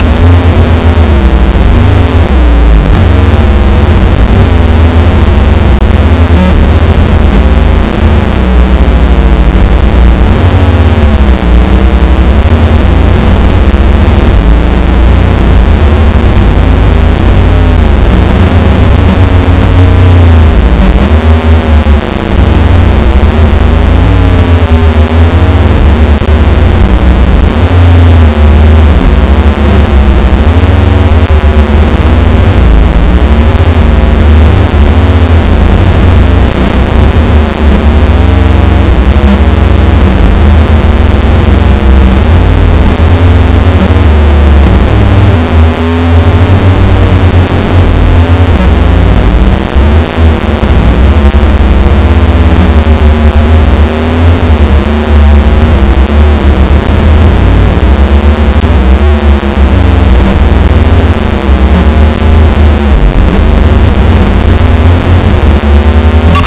Made by importing misc files into audacity as raw data.
ouch, insanity, waow